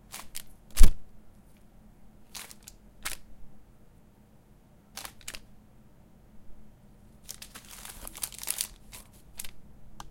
Paper Movement and Crumble
Paper, Movement, Crumble